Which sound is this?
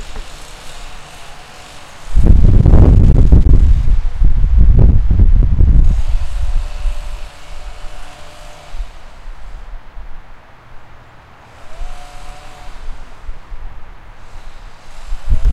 outside, lawnmower ambient (wind)
Wind with the occasional clear sound of a lawnmower at medium distance (probable conversation between recorders) midlevel large open area outside. Rain, right after rain fall.